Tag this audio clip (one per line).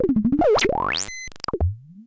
bleep
nord
digital
glitch
gurgle